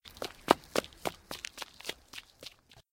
Footsteps Running Away Fading 1
Sound effect for a person running away (1 of 2). A second version and a full recording of many types of running and walking are also available.
Recorded with a Samson Q7 microphone through a Phonic AM85 analogue mixer.